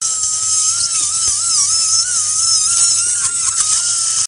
OP Bohrer 5
Geräusche aus einem Operationssaal: Drill noise with clinical operating room background, directly recorded during surgery
clinical, Ger, Klinischer, noise, OP, Operating, Operationssaal, OR, surgery, Theater, usche